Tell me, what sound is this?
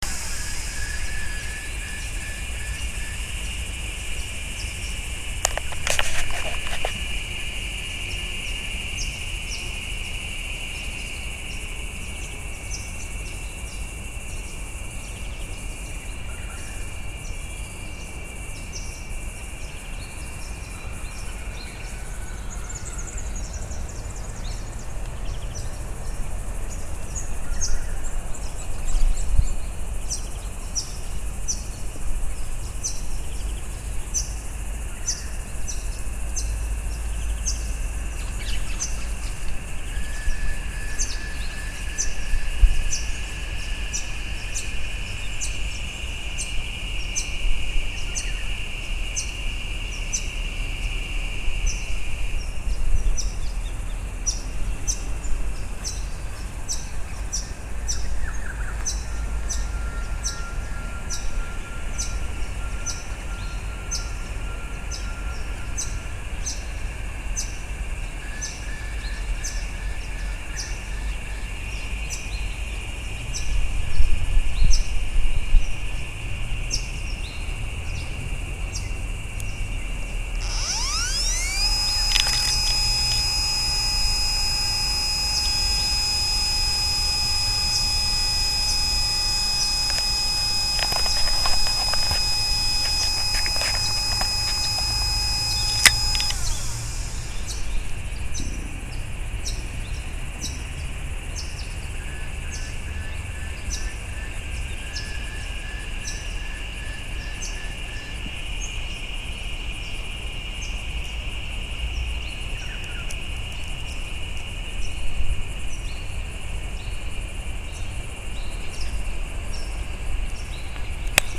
Summer afternoon, 3:30pm, recording of a walk in a Eucalypt forest (River Red Gum) 'Island Sanctuary' between town centre of Deniliquin (Riverina District, New South Wales, Australia) and Edward River. Can hear twittering bird noises of Blue Wrens and other small birds, whispery shrill of cicadas, 'doodli-oop' call of Peaceful Dove and background hum mixture of bees in trees, country town ambient noise and probably some recording sound. The Island Sanctuary is also a roosting site for galahs and corellas but they can't be heard in this recording - probably a bit too early.
afternoon, australia, birds, bluewren, cicadas, country, deniliquin, dove, eucalypt, forest, peacefuldove, sanctuary